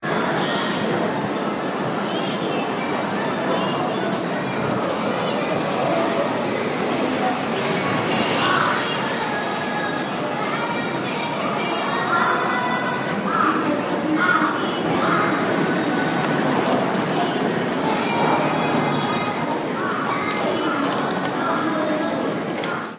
Tokyo Street
a cozy street in Tokyo with music coming from a distance
music, shopping, street